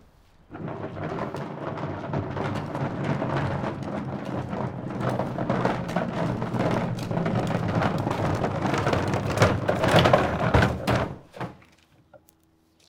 Recycle Bin Roll Stop Plastic Wheel Cement
recycle-bin, trashcan